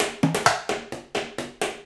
IMPROV PERCS 072 1 BAR 130 BPM
Sources were placed on the studio floor and played with two regular drumsticks. A central AKG C414 in omni config through NPNG preamp was the closest mic. Two Josephson C617s through Millennia Media preamps captured the room ambience. Sources included water bottles, large vacuum cleaner pipes, wood offcuts, food containers and various other objects which were never meant to be used like this. All sources were recorded into Pro Tools through Frontier Design Group converters and large amounts of Beat Detective were employed to make something decent out of our terrible playing. Final processing was carried out in Cool Edit Pro. Recorded by Brady Leduc and myself at Pulsworks Audio Arts.